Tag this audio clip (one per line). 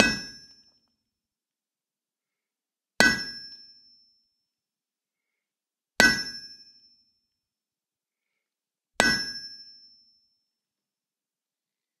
4bar
80bpm
anvil
blacksmith
crafts
forging
iron
lokomo
loop
metallic
metal-on-metal
metalwork
smithy
very-hot
work
yellow-glow